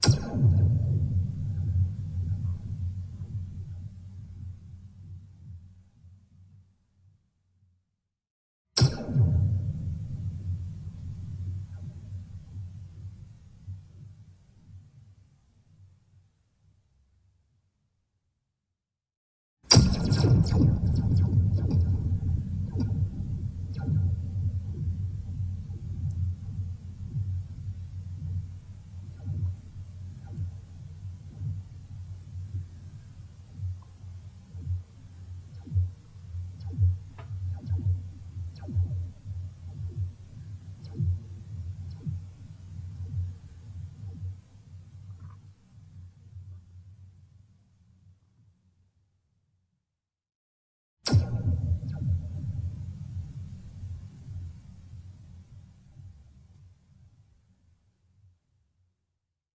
Long Laser Shots
Recorded in a vocal booth, "playing" a long metallic spring.
Microphone used: AKG C 451 EB preamp module and an Oktava MK012 hypercardioid capsule (with a gorgeous adapter, of course).
Mixer: PSC M4 mkII
Recorder: Tascam DR40
space-invaders, space